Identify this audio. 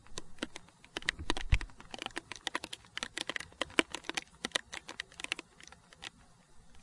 Rain drops keep falling on my laptop on the patio. Recorded with built in mics. Processed with some noise reduction in cool edit 96.